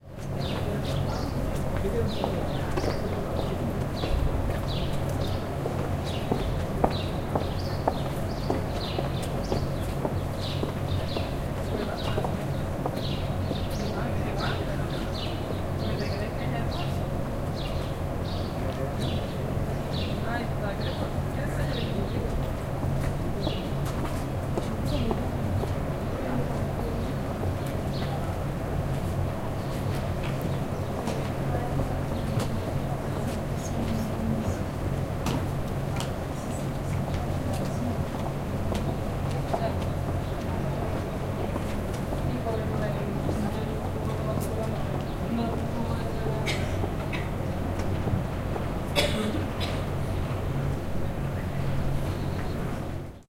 0231 Street birds
Birds. People talking in Spanish and walking.
20120324
birds, caceres, field-recording, footsteps, spain, spanish, voice